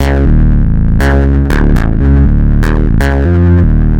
Electronic Bass loop